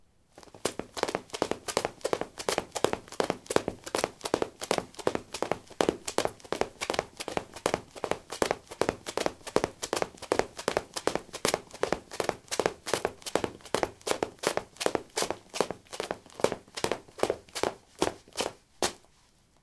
Running on linoleum: high heels. Recorded with a ZOOM H2 in a basement of a house, normalized with Audacity.